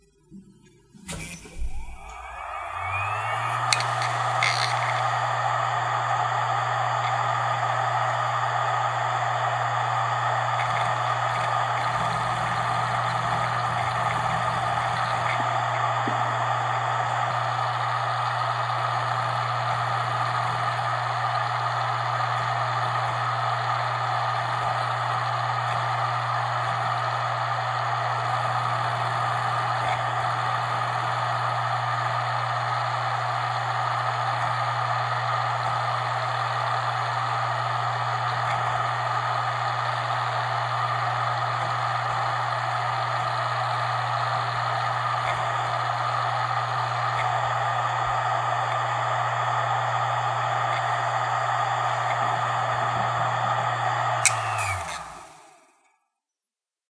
WD Caviar BB - 7200rpm - FDB
A WD hard drive manufactured in 2005 close up; spin up, writing, spin down.
This drive has 1 platter.
(wd caviar wd800bb)